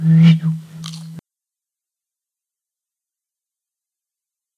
bottle pipe 02
sound of an air blown into bottle
air, bottle, flute